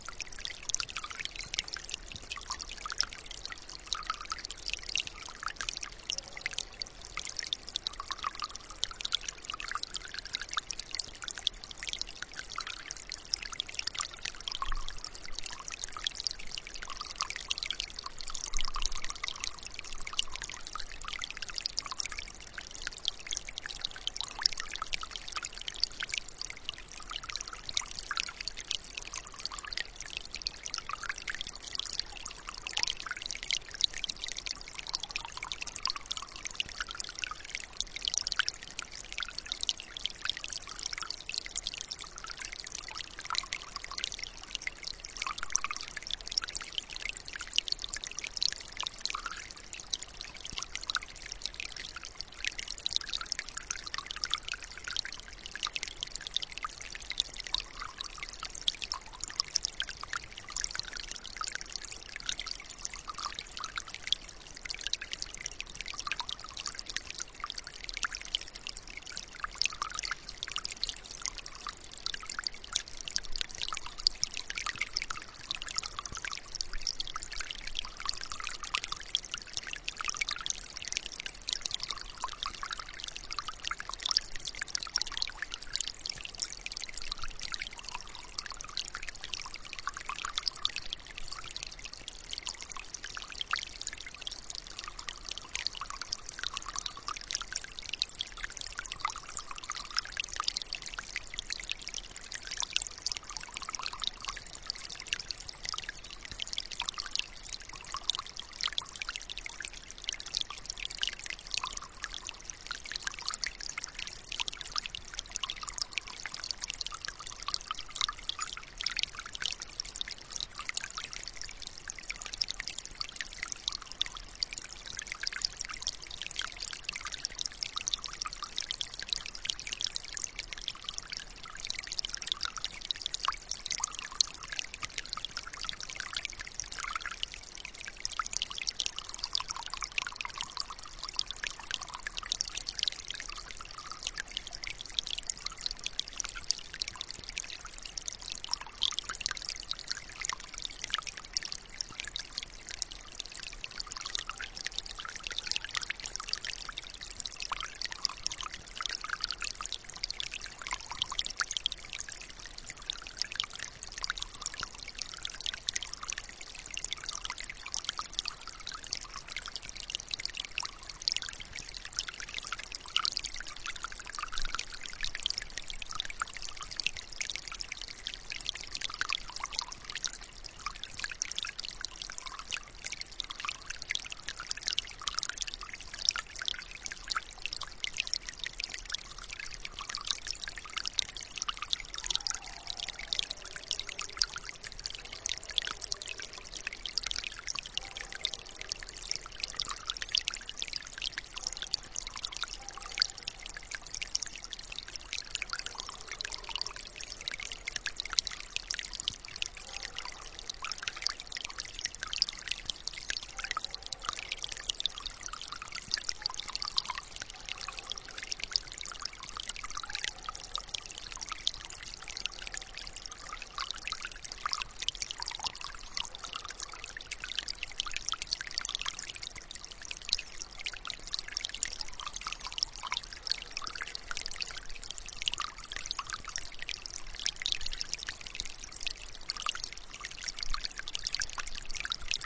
melting snow
Recorded with Zoom H1, water white noise loop from forest with barking dogs birdsongs in the distance.
white-noise, water, melting-snow, barking-dogs, nature, forest